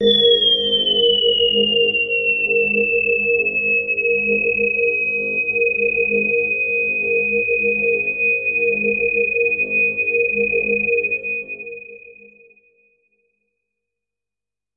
High Resonance Patch - G#3
This is a sample from my Q Rack hardware synth. It is part of the "Q multi 006: High Resonance Patch" sample pack. The sound is on the key in the name of the file. To create this samples both filters had high resonance settings, so both filters go into self oscillation.
electronic, multi-sample, resonance, synth, waldorf